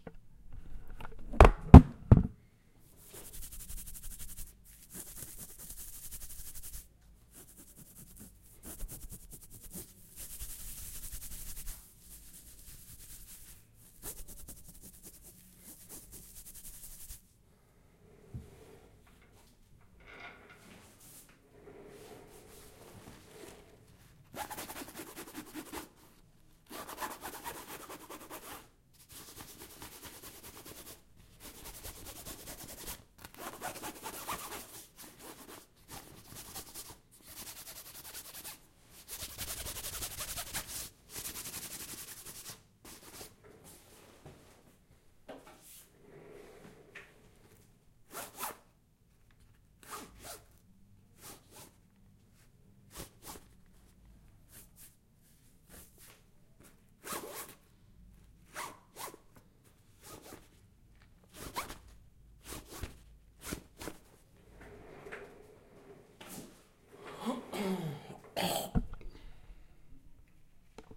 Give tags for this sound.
itch; Scratch; Scratching

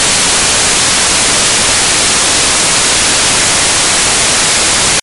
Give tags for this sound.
background-sound; ambient; ambience; tv-noise; white-noise; atmosphere

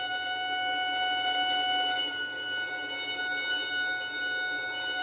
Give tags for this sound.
note; pitched; long; high; shrill; squeak; sustain; violin

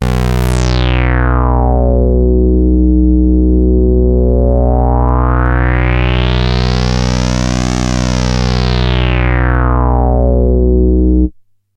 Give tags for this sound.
processed; MC-202; Roland

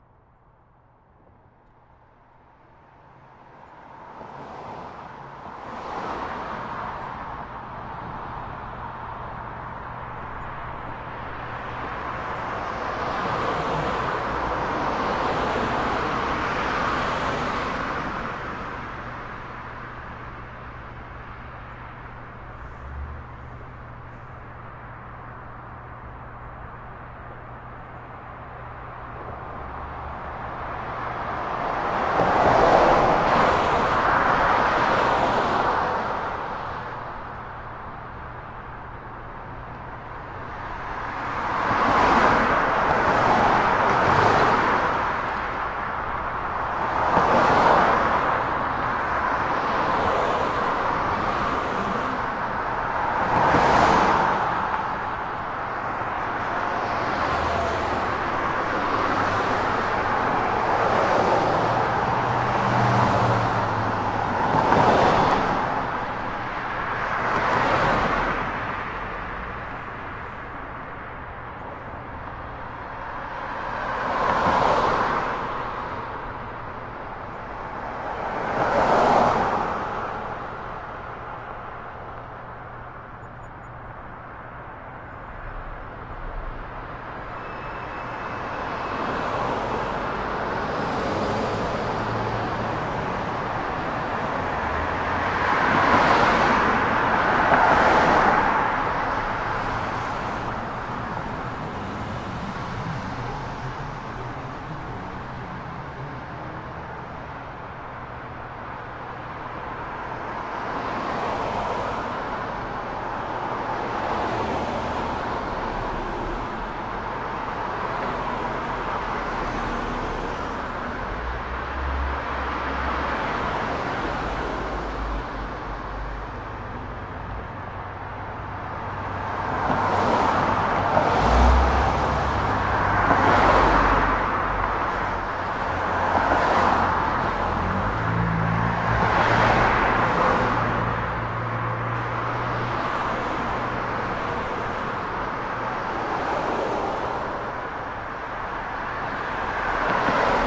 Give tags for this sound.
bridge; bys; don; parkway; subway; toronto; traffic; under; valley

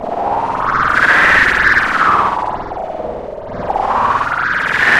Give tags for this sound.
gnr; bruit; Audacity; sur

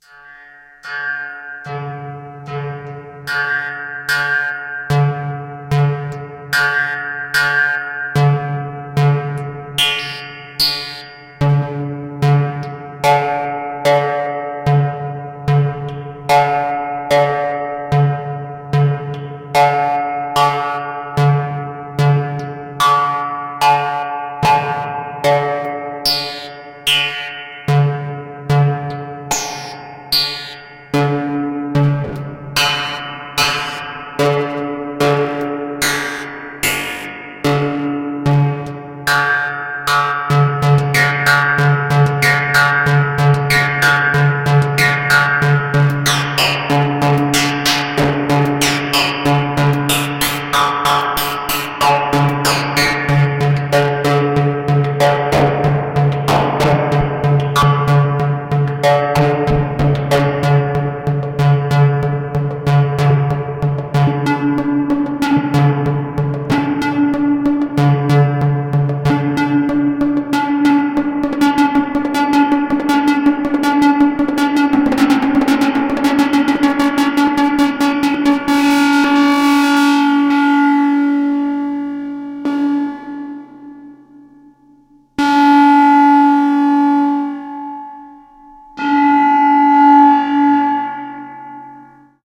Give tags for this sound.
patch; synth; analogue; synthesizer; electronic; modular; spring; raw

